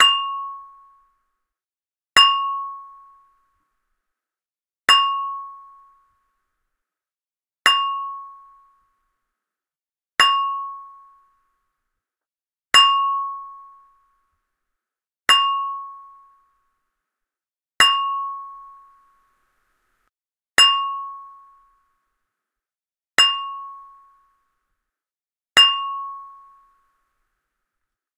These days elevator bells have become electronic through softer dings and beeps... WHO NEED THOSE?! Why not settle for an old fashioned metal bell ringer that is loud, clear, and wakes up elevator riders who have fallen asleep while reaching the 12th floor!
(Recorded using a Zoom H1 recorder, mixed in Cakewalk by Bandlab)
Bell, Ding, Elevator, Loud, Ring